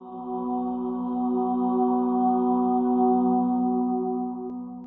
random chord
me singing a chord